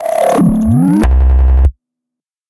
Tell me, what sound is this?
Overdriven Synthetics
Overdriven glitch sound from repetitive clicks fed through distortion plug-ins and filters.
Thank you!
acid, bass, beep, buzz, computer, distorted, electronic, error, fuzz, glitch, noise, overdriven, synthetics, whirr